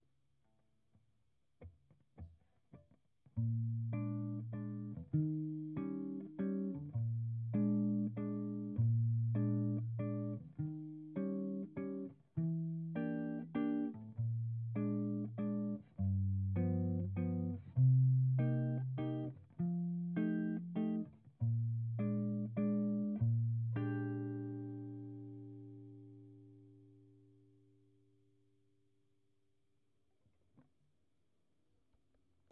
Accompaniment for repetitive guitar melody for blues at 84bpm.